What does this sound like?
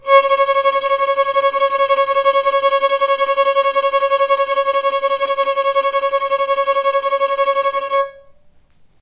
violin tremolo C#4
tremolo, violin